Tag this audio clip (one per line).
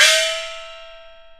xiaoluo-instrument
qmul
percussion
china
chinese
gong
icassp2014-dataset
beijing-opera
peking-opera
idiophone
chinese-traditional
compmusic